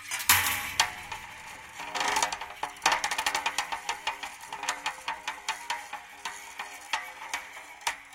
chain link fence abuse
recordings of a grand piano, undergoing abuse with dry ice on the strings
torture, dry, screech, ice, abuse, piano, scratch